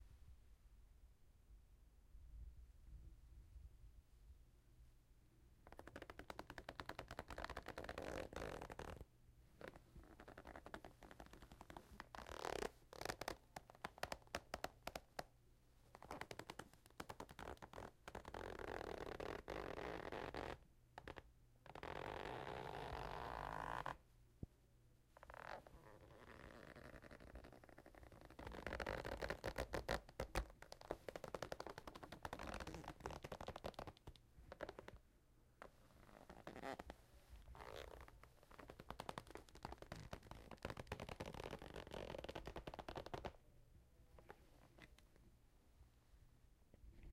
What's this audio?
The name Obi Creak comes from how I recorded the sound. It came from my Obi (belt used in martial arts) when I twisted and turned my blade. Great for bowstring sounds or creaking stairs.